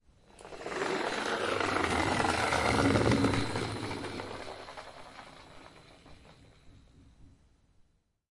Toy car passing by
Cheap, medium-sized, plastic toy car rolling on wooden floor. Recorded with Zoom H1.
car, childs-toy, rolling, toy, toy-car, vehicle